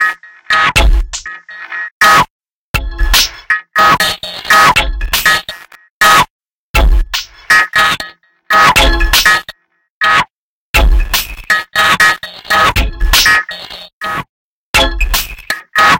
DestructoPerk4 LC 120bpm
Distorted Percussion Loop
distorted, loop, percussion